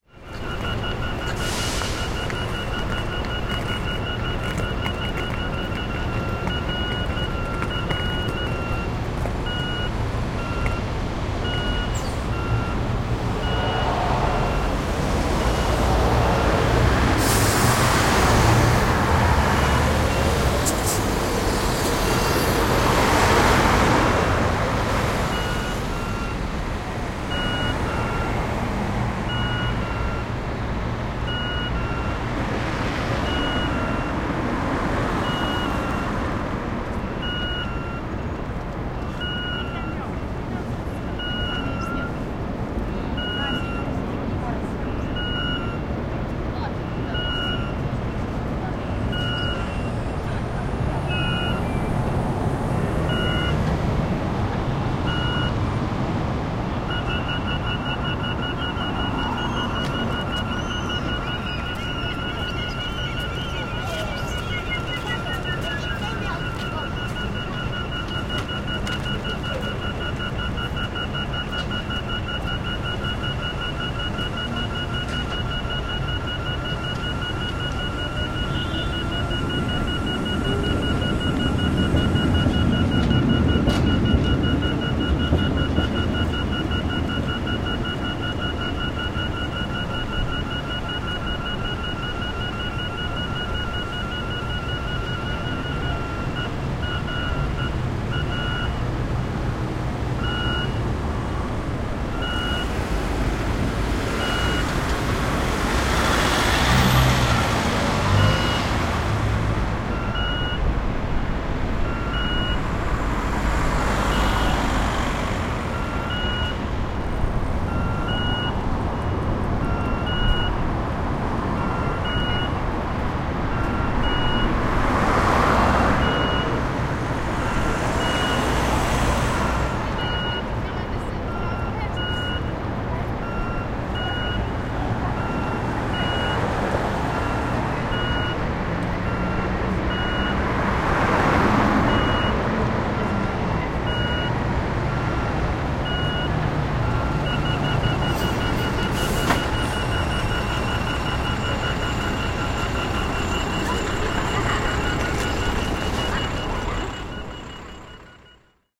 Liikennevalot, katuhäly, ääniopaste / Pelican crossing in the city, traffic. traffic lights, bleeping audible signals, Helsinki, 2000s
Suojatie kaupungissa, liikennettä, liikennevaloja, piippaavia ääniopasteita kadun eri puolilla. Helsinki, 2000-luku.
Äänitetty / Rec: Zoom H2, internal mic
Paikka/Place: Suomi / Finland / Helsinki
Aika/Date: 30.07.2008
Audible-signal, Bleep, City, Field-Recording, Finland, Finnish-Broadcasting-Company, Katu, Kaupunki, Liikenne, Liikenneturvallisuus, Liikennevalot, Pedestrian-crossing, Piping, Road-safety, Soundfx, Street, Suojatie, Suomi, Tehosteet, Town, Traffic, Traffic-lights, Yle, Yleisradio